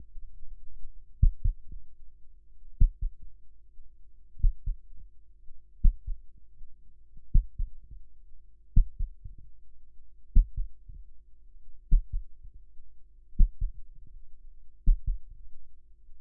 Heartbeat Steady
This is a imitative heartbeat recording. I recorded using a contact microphone (Korg CM-200) connected to my Focusrite 2i2 interface. I attached the microphone to the tip on my middle finger, then with my middle and index finger tapped gently where my finger meets my palm.
This recording features a steady Heartbeat rhythm.
I used a Low pass filter to remove most of the treble making the recording sound more accurate to an actual heartbeat. I also removed a low buzz caused by be having to crank the gain high to get my intended sound.